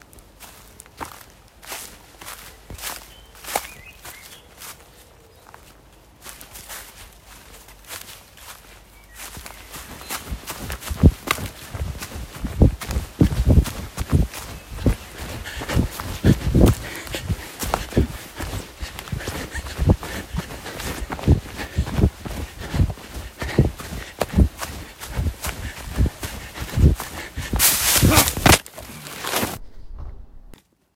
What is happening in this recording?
A sound file recorded on my iPhone 11 simulating walking through woods, hearing something that makes you start running until you suddendly falls... then silence...